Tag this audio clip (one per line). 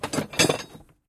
bar
handling
metal
movement